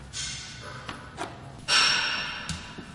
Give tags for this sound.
cellar; iron; tube; interior; field-recording

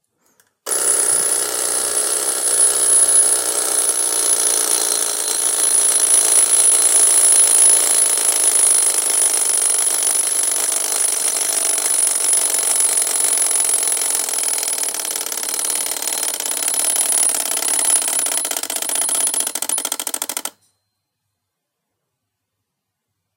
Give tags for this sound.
awful; early-morning

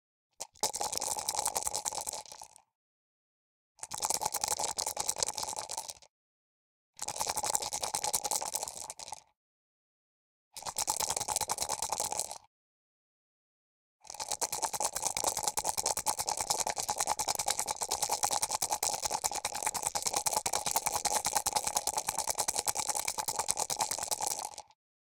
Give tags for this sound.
dice; die; foley; game; yatzy

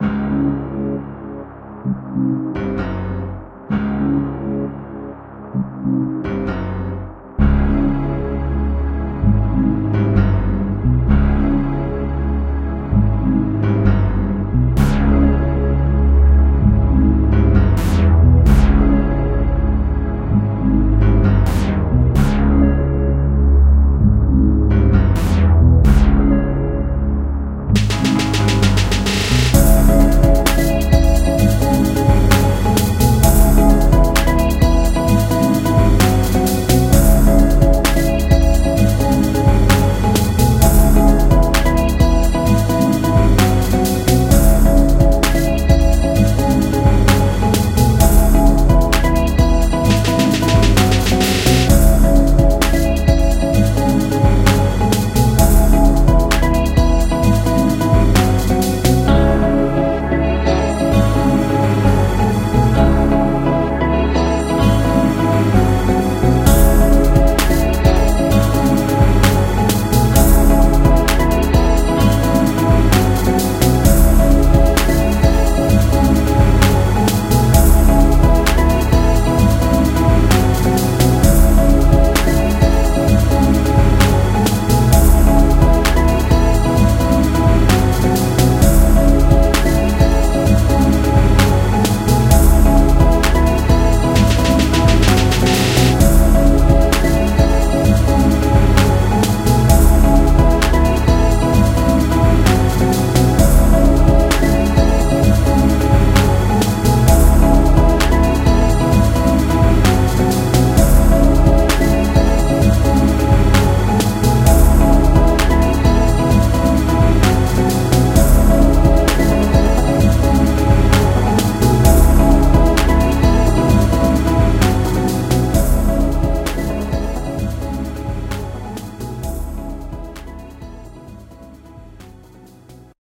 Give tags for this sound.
130-bpm; aggressive; beat; breakbeat; curious; Dbm; drum-loop; drums; exciting; garbage; groovy; hi-hat; hip-hop; hiphop; improvised; loop; minor; percs; rhythm; rubbish; stand; sticks; trap

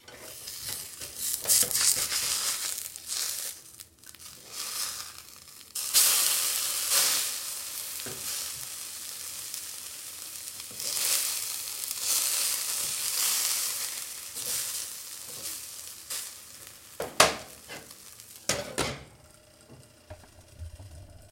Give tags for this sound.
sizzle; environmental-sounds-research; cook; kitchen; pan; frying

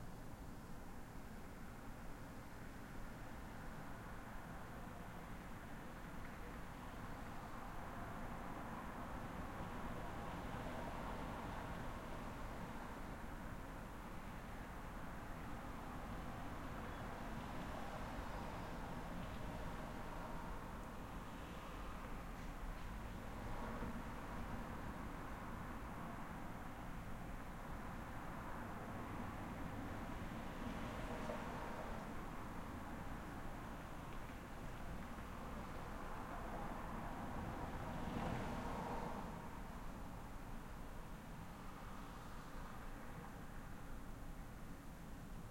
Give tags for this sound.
city; insects; urban; traffic; cars; street; field-recording; noise; crickets; night; ambience

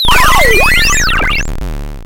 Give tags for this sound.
8-bit; 8bit; chip; chipsound; chiptune; powerup; retro; video-game

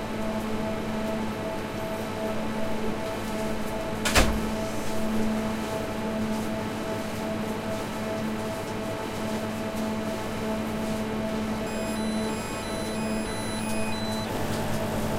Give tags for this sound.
air-conditioner; ambience; closing-door; door-closing; electric-machine; engine; hum; industrial; lab; laboratory; machine; mechanical; mid-frequency; motor; motor-noise; noise; refridgerator; ventilation

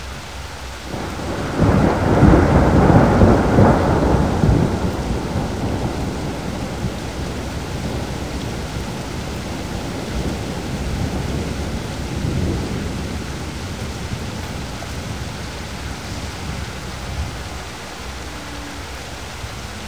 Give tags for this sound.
AMBIENCE; NATURE; RAIN; STORM; THUNDER; WIND

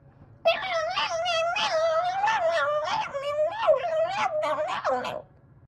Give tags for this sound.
mad; mouse; unhappy; walla

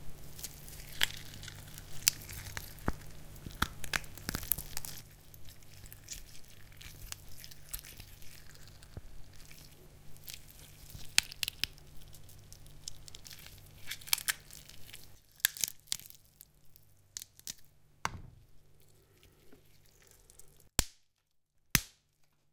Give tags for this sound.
flesh; gore; violence; smash; punch; break; bone; horror; crunch; poultry; bones; corpse; breaking; chicken; fracture; crack; meat; cadaver

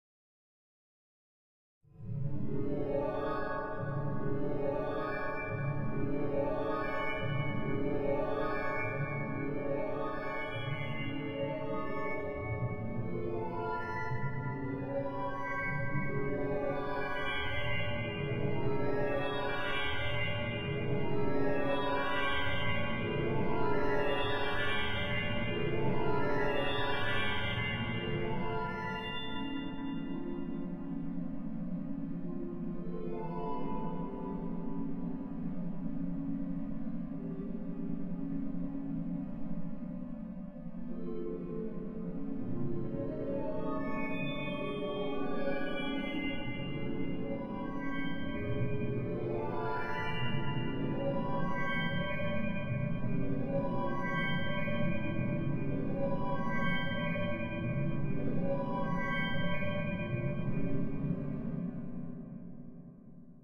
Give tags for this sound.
spooky; dream; creepy

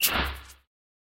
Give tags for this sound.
Drum; Drums; Erace; Hate; Hip; Hop; Nova; Percussion; Sound; The

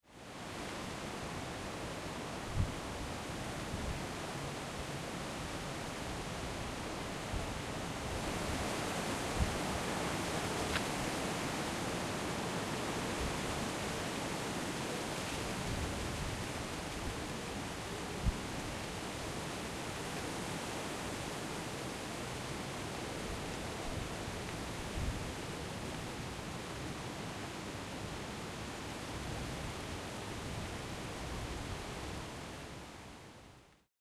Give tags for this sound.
ambience; birds; birdsong; breeze; field-recording; forest; nature; summer; trees; wind; windy; woods

